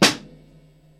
Snare Drum sample with Shure-PG52
Snare Drum sample, recorded with a Shure PG52. Note that some of the samples are time shifted or contains the tail of a cymbal event.